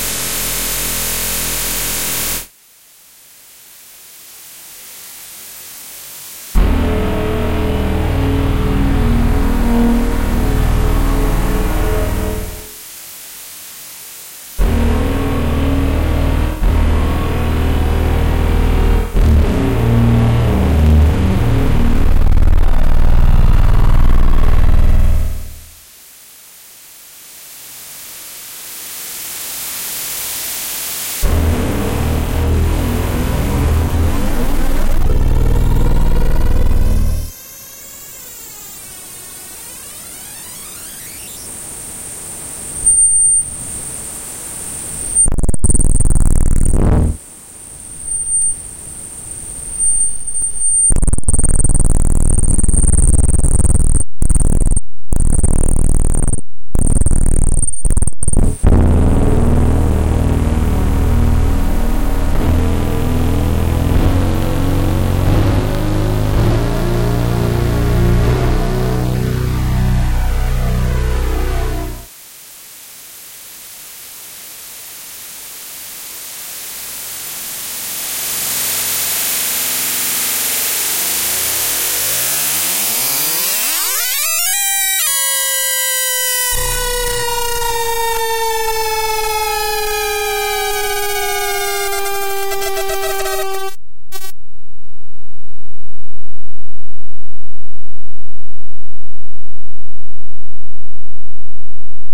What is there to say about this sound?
A series of feedback noises created with the open-source software LMMS and recorded with Audacity.